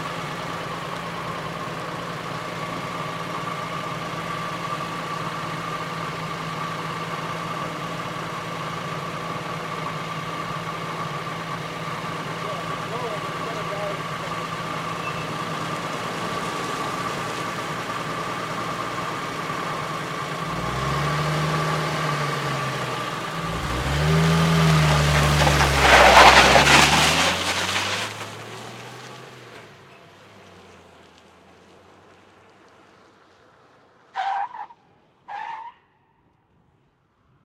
57 chevy drive away and distant tire squeal 1-2
A 57 Chevy running the engine and then driving away from gravel to pavement with a tire squeal in the distance
drive, away